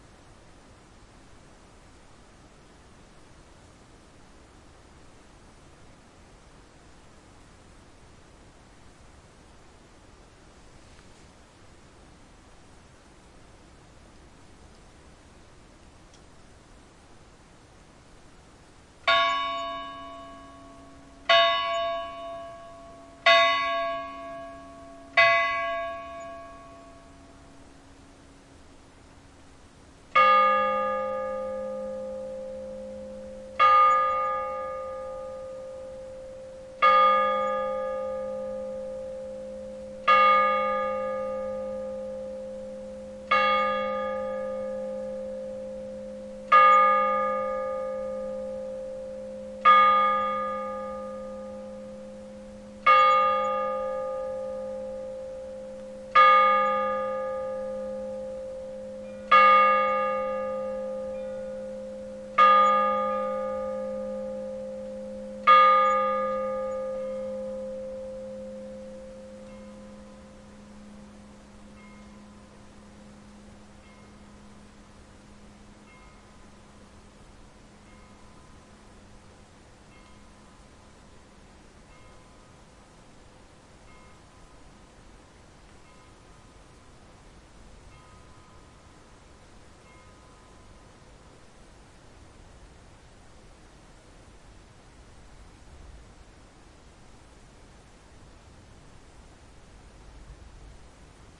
140809 FrybgWb BellTower Midnight R
Midnight in a vineyard by the German town of Freyburg on Unstrut.
In the foreground, wind in the trees of the forest below can be heard.
Then, the tower bells of the keep of Neuenburg Castle, located on the hill opposite, toll midnight. They do this by tolling a high bell 4 times, once for each quarter of the full hour, followed by a lower bell tolling the hour 12 times. This is repeated almost instantly by a similar clock somewhere on the distant side of the castle hill.
The recorder is located in front of a small cabin at the bottom of the vineyard, facing across the valley between vineyard and castle.
These are the REAR channels of a 4ch surround recording.
Recording conducted with a Zoom H2, mic's set to 120° dispersion.
4ch, ambiance, ambience, ambient, atmo, atmosphere, bell, field-recording, Freyburg, midnight, nature, night, ring, ringing, rural, summer, surround, tolling, tower, Unstrut, vineyard